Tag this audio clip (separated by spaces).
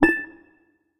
bell clink compact ding glass hit impact metal metallic percussion ping pling pluck pong ring short small tap ting